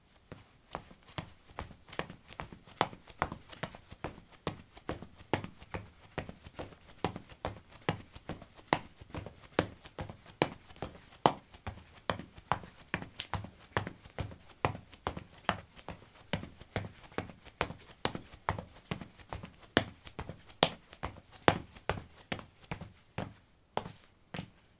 concrete 06c ballerinas run
Running on concrete: ballerinas. Recorded with a ZOOM H2 in a basement of a house, normalized with Audacity.